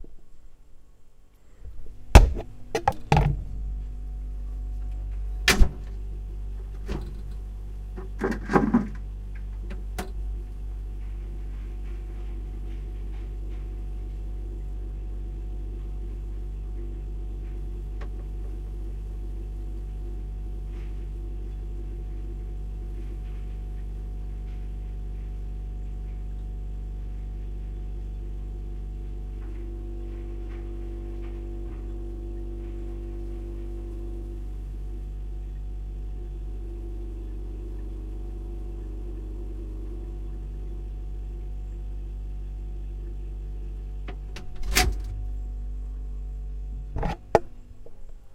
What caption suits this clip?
How does it sound inside a closed refrigerator? My Zoom H2 found out!